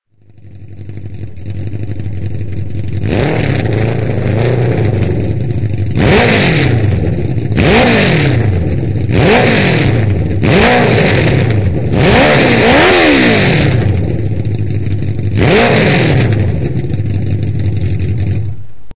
vrooming Sound of an engine